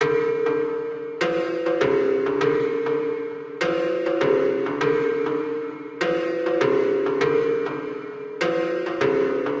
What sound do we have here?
Horror Sounds 11
ambiance, atmosphere, creepy, dark, evil, horror, loop, musicbox, scary, sinister, spooky, thrill